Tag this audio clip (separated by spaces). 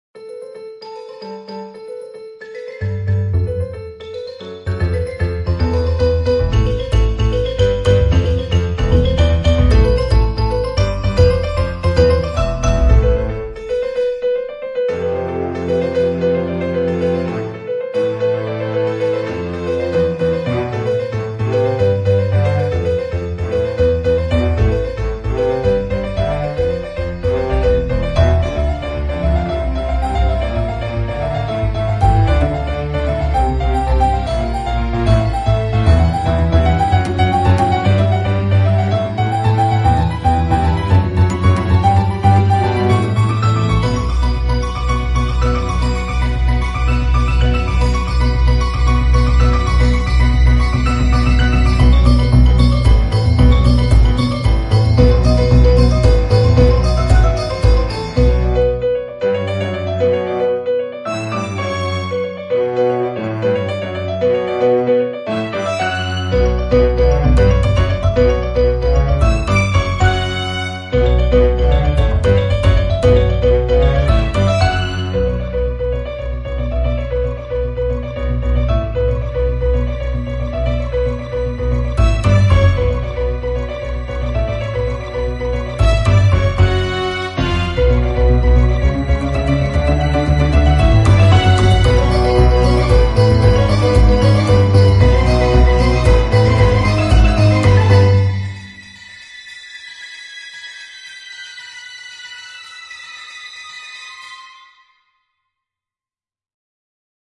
orchestral instrumental dynamic progressive soundtrack